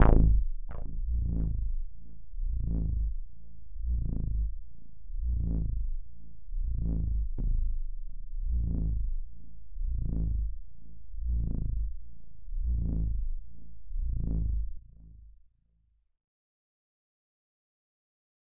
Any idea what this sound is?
Basspad (Phase)
bass, pad, synth